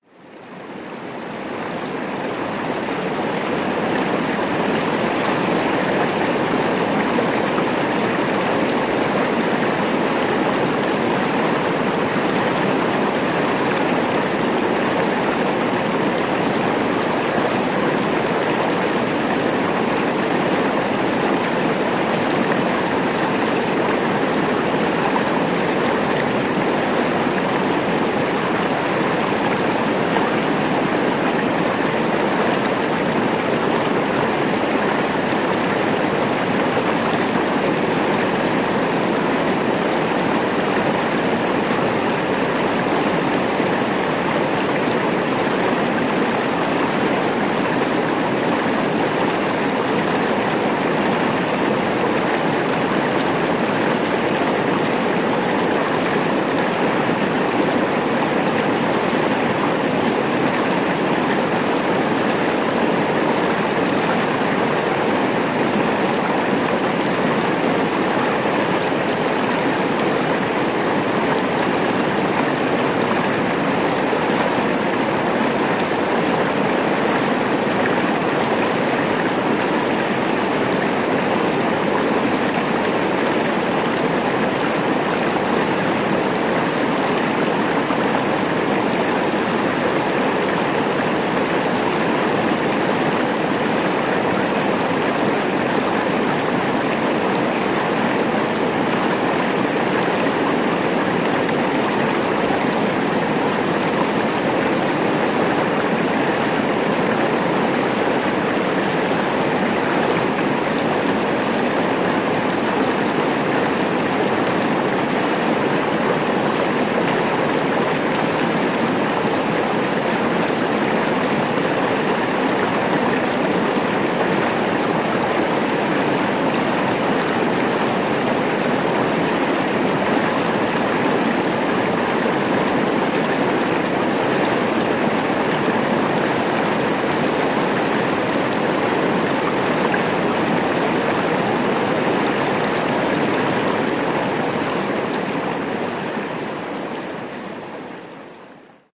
Gurgling rapids in a moderate size river